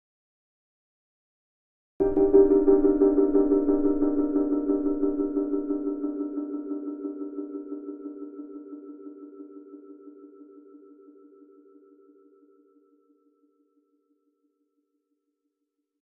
A piano chord with a pulsating dub style delay.